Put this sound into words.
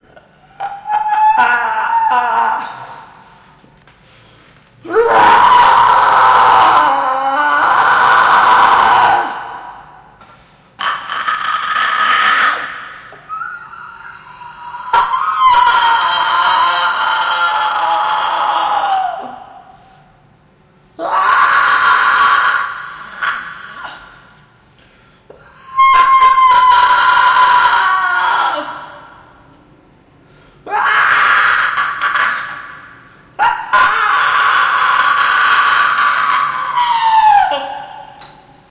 Torture screams and moans
Screams and moans. Clipping for effect. Abyssal reverb.